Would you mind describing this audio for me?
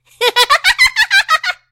another laugh for minkie
minkie, laugh, obsidian, pie